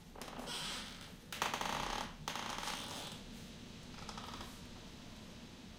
squeaks-loop006
floor, stereo, squeaks, recording, home, loops